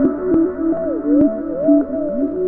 hf-7305 110bpm Tranceform!
This would fit well in a worldbeat song. Made with TS-404. Thanks to HardPCM for the find, this is a very useful loop tool!